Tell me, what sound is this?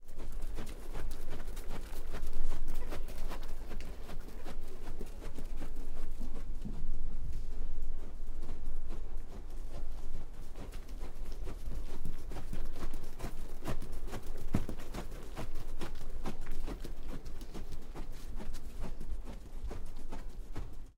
Horse Walking By In Dirt 01
Multiple horses pass by the microphone at a trotting pace.
dirt, Horses, trotting